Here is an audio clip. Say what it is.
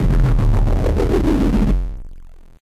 Game (of life) Over!
tone, sinister, death, ambient, rumble, game, retro, effect, atmosphere, weird, drone, terror, died, low, gaming, videogame, creepy, noise, deep, bass, 8bit, sub, ambiance, distortion, scary, spooky, dark, die, horror, distort